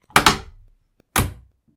Magnetic latch cupboard door
The sound of a cupboard with a magnetic latch being opened and closed.
Recorded with a Zoom iQ7.
close, cupboard, door, magnets, metal, open, wardrobe